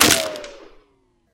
Ricochet wood2
wood, ting, gun, bang, pow, snap, metal, shoot, ping, ricochet, crack